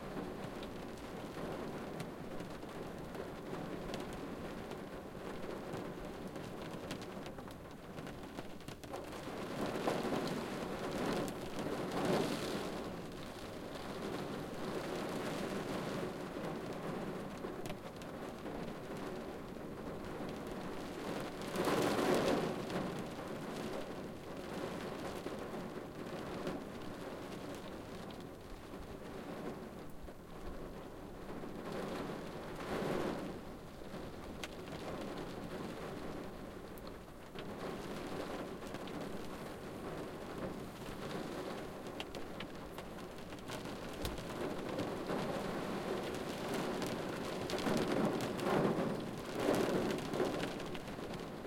Rain From Inside a Car Loop (2)
Fully Loopable! Rain and wind ambience recorded inside a car.
For the record, the car is a Hyundai Getz hatchback.
The audio is in stereo.